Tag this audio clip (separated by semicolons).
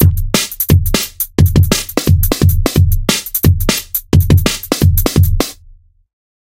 break; drum-loop; groovy; breakbeat; dnb; drums